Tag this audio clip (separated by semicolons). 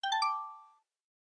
Long; Notification; Alert; Bell; Yamaha; Low; Muffled; Percussive; Off; High; Minimal; Synthethizer; Vintage; Synth; Sound; Digital; Design; Keyboard; Short; Error; On; Reward; PSR36